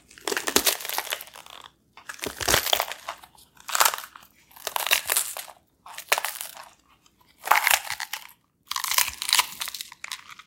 Wet Crunching 3
Wet crunching sounds of a bell pepper. Could be used for a zombie eating brains, or maybe some fast growing vines in a cave. Or perhaps stepping on some wet earth. Slightly echo room. Endless opportunities.
Recorded on a Blue Yeti Microphone. Background noise removal.
apple, bell, bite, chew, crunch, eat, grow, interior, munch, pepper, vines, wet, zombie